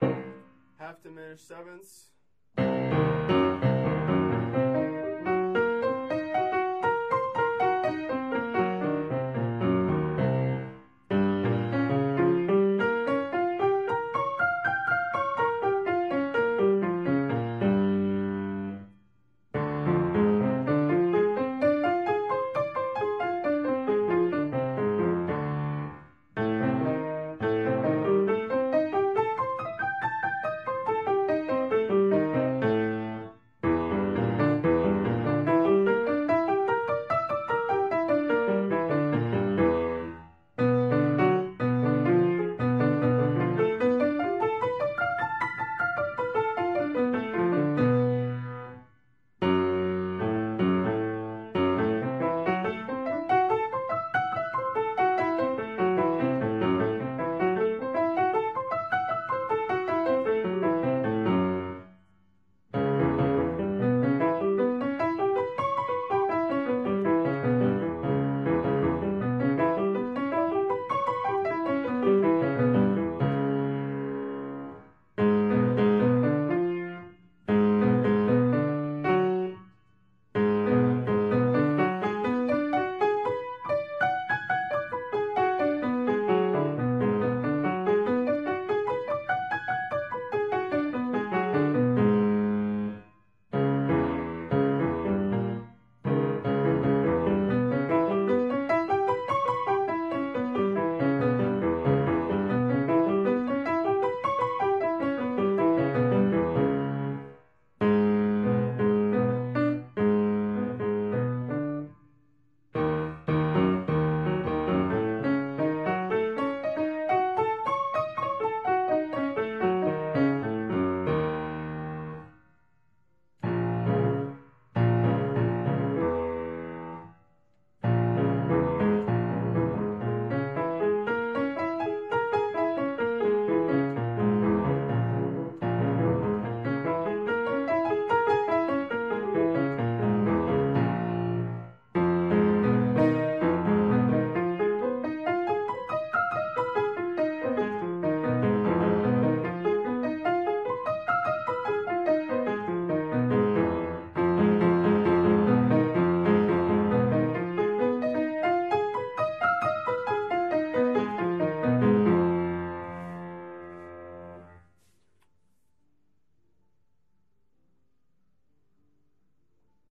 Practice Files from one day of Piano Practice (140502)
Logging, Piano, Practice